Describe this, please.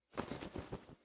bird flapping 6
Various bird flapping